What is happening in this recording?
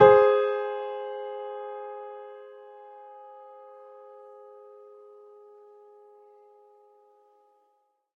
Usyd Piano Chords 08
Assorted chord oneshots played on a piano that I found at the University Of Sydney back in 2014.
Sorry but I do not remember the chords and I am not musical enough to figure them out for the file names, but they are most likely all played on the white keys.
keys, chords, piano